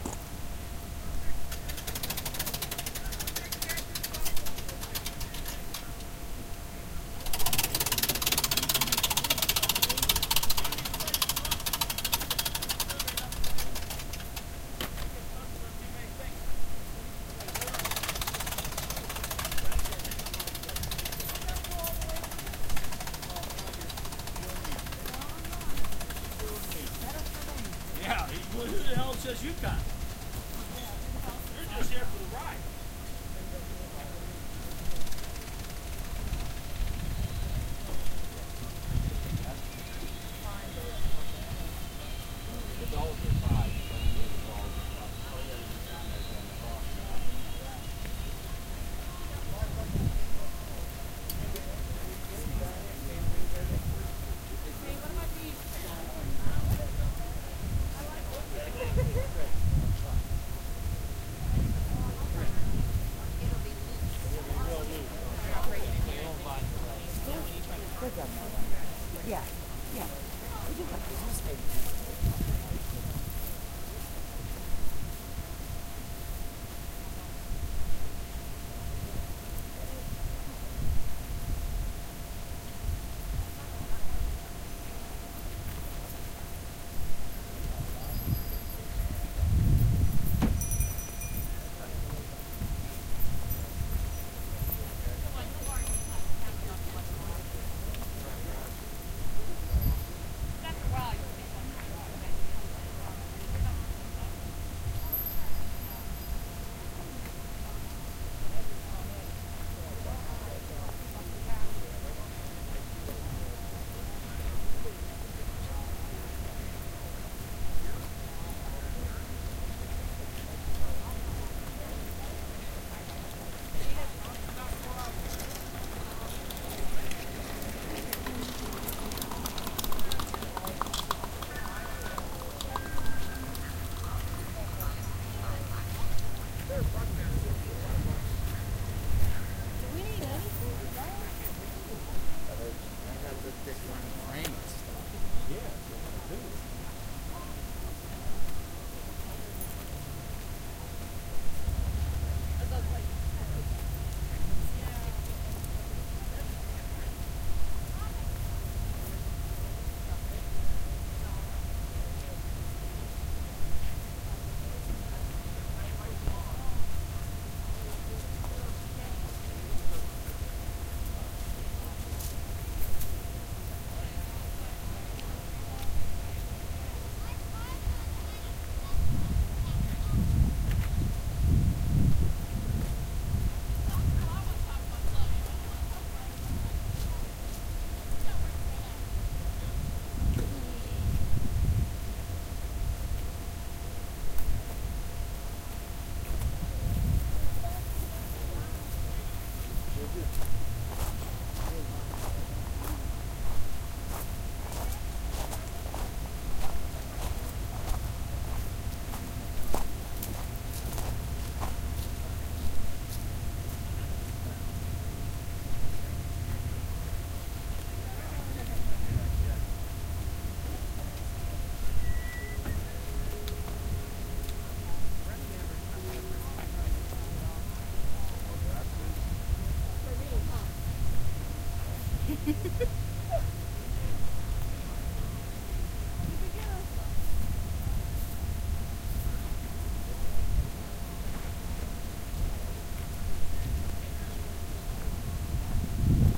A walk through a flea market near Oakdale Pennsylvania. Recorded with a home-made binaural microphone.